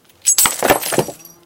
Breaking Glass 22
Includes some background noise of wind. Recorded with a black Sony IC voice recorder.
shards, crash, pottery, glasses, breaking, glass, smash, crack, shatter, splintering, break